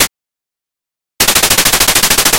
8-bit Sub Machine Gun
8-bit SMG sound. Fired once, and then repeated at 800RPM.
asset, arcade, video-game